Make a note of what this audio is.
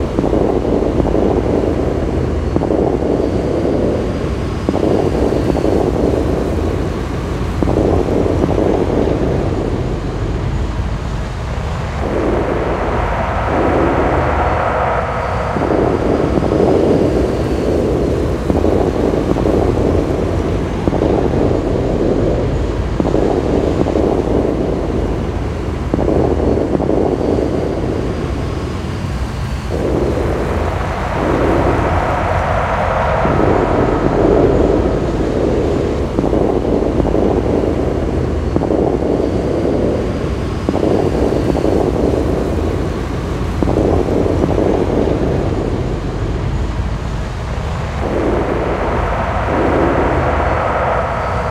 battle scene for film for game final by kk
army, attack, battle, bomb, explosion, fight, film, grenade, military, tank, war